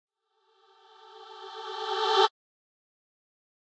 fadein choir
A simple EQ'ed Sytrus choir fading in.